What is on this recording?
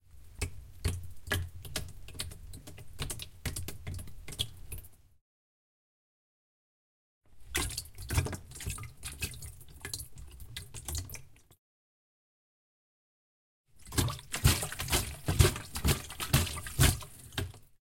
1 swimming pool
splash of water